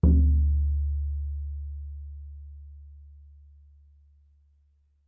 African Drum3
Single shot on african hand drum.